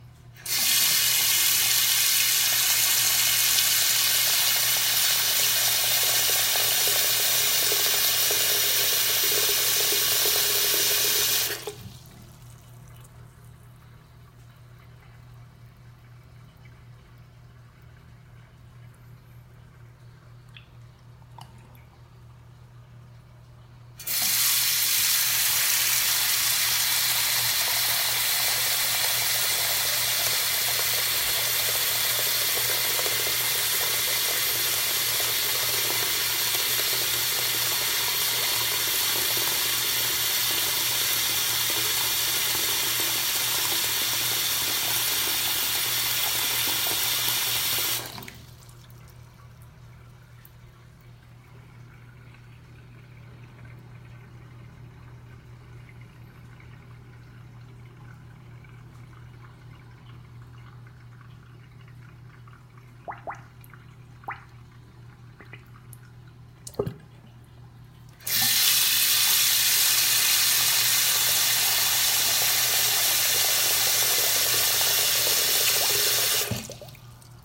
You are welcome to use this sound any way you wish.
Sink water running. water faucet running in the bathroom.